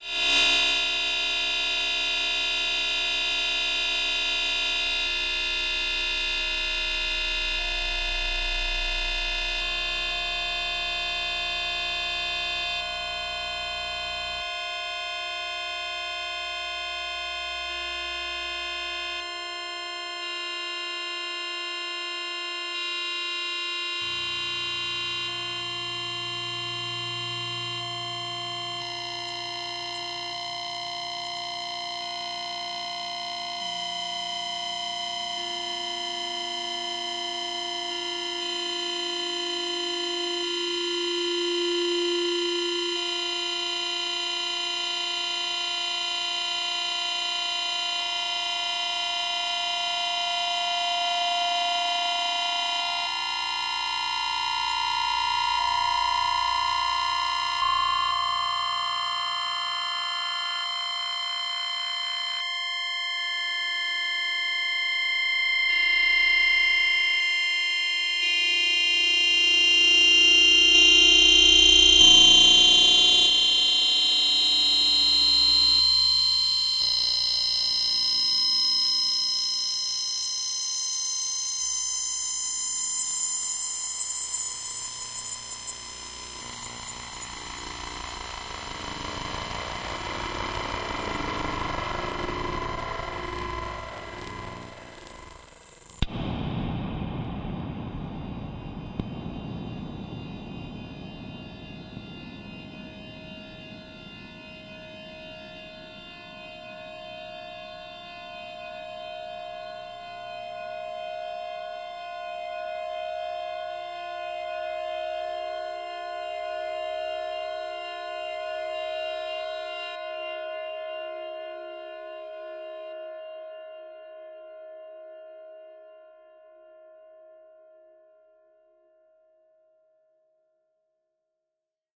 VIRAL FX 01 - C6 - SAW FILTER SWEEP plus REVERB BURST
Created with RGC Z3TA+ VSTi within Cubase 5. Noisy effect with very slow filter sweep followed by a heavily reverb noise burst. The name of the key played on the keyboard is going from C1 till C6 and is in the name of the file.